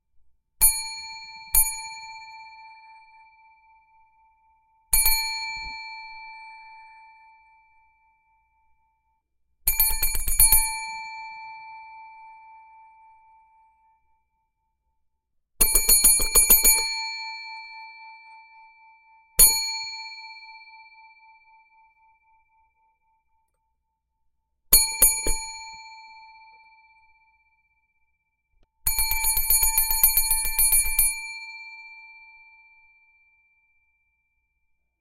Service Bell ringing (Angry)
Forceful/angry attack banging on a standard desk/hotel service bell.
Recorded on Zoom H4.
angry, bell, chime, customer, ding, force, hotel, ring, serve